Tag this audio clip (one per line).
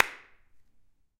handclap
clap
natural